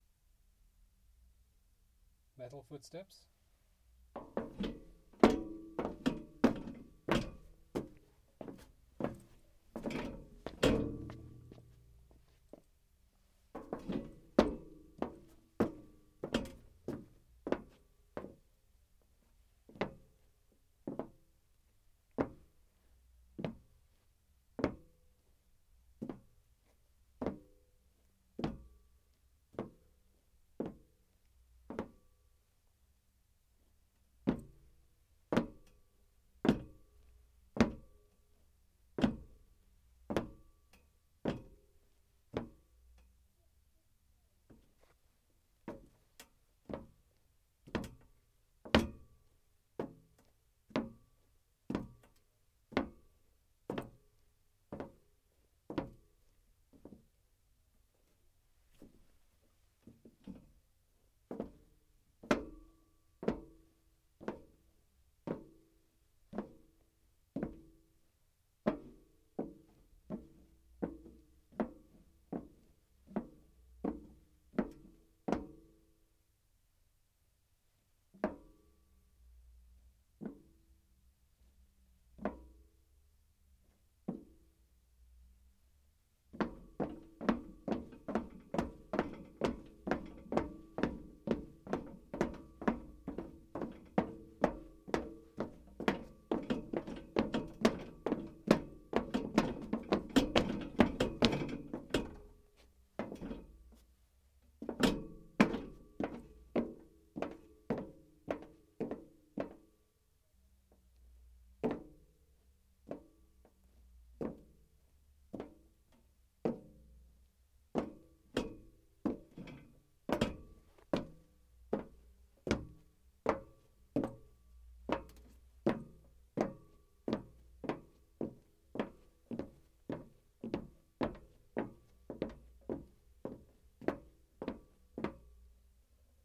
footsteps boots metal
footsteps, metal, boots